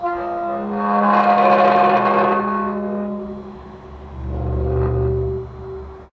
Heavy wrought-iron cemetery gate opening. Short sample of the groaning and rattling sound of the hinges as the gate is moved. Field recording which has been processed (trimmed and normalized).
hinges, groan, iron, gate, creak, metal